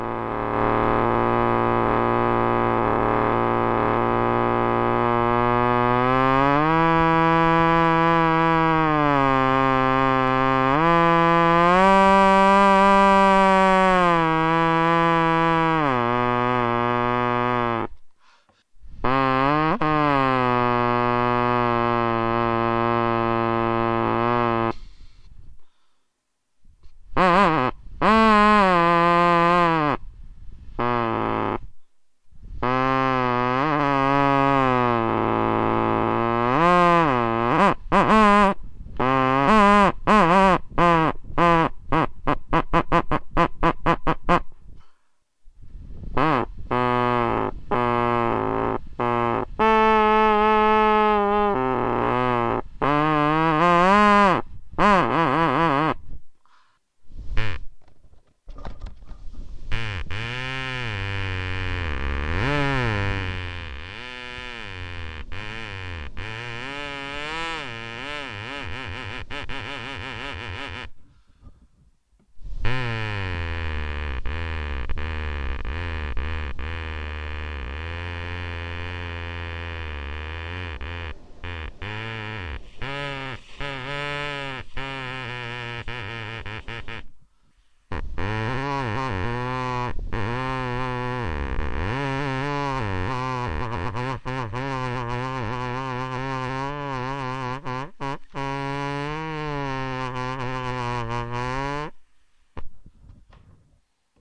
I played about 30cm long dandelion and recorded it.. if you want to play your own dandelion just cut the straw at the tip and bottom (longer gives deeper tone) then press the softer upper part so it breaks the straw into two "lips", put the straw in between your lips, be careful not to touch it with your tongue.. tastes horrible, and blow